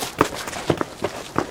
RUNNING Two People
Two people running in the same direction.